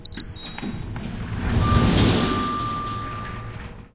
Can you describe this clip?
An opening Elevator door.